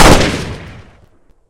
Layered Gunshot 7
One of 10 layered gunshots in this pack.
layered, shoot, pew, shot, epic, awesome, cool, bang, gunshot